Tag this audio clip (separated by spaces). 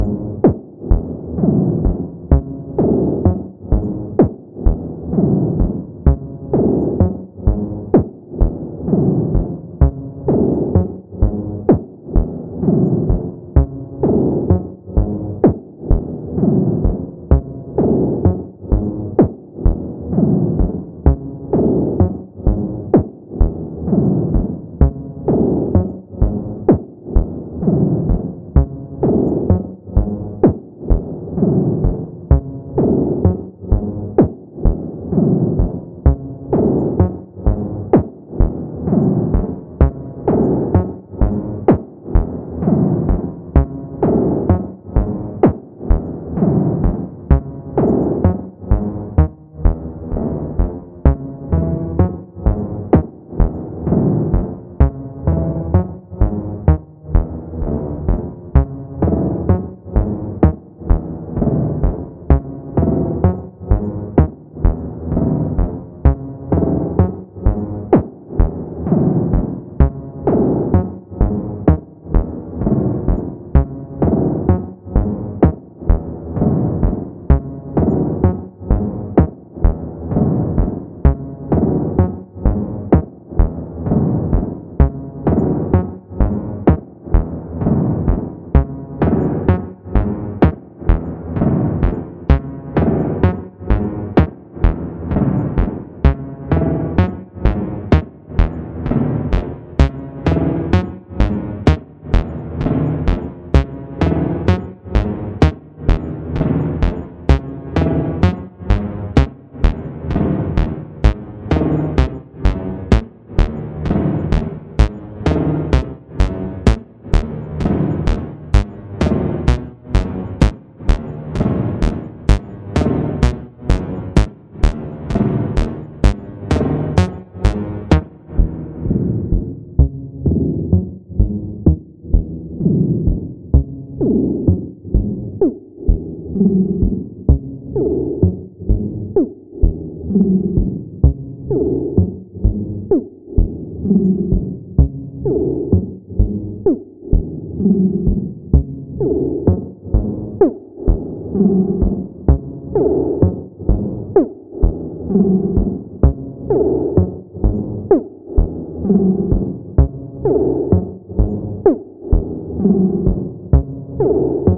bass deep digital electronic loop melody modular synth synthesizer